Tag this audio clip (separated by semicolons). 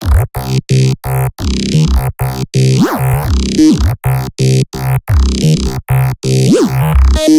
FX; Dance; Electric; effect; sound-effect; 4x4-Records; soundeffect; compressed; digital; sound; sound-design; Pad; sfx; Music; sample; sounddesign; J-Lee; EDM; Riser